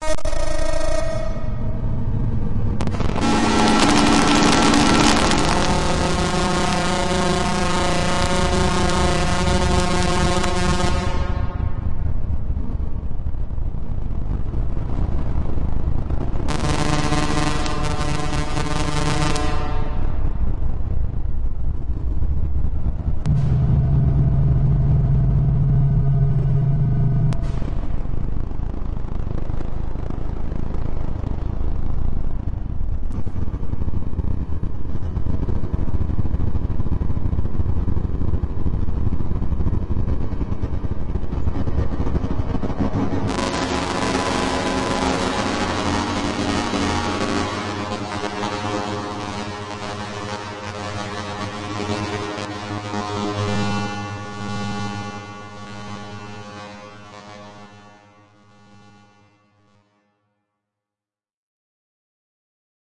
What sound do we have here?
This is a UFO sound created by a waveform generator and manipulated with Audacity to create an eerie sound of a UFO flying away. However, if you decide to use this in a movie, video or podcast send me a note, thx.
scratchy, ufo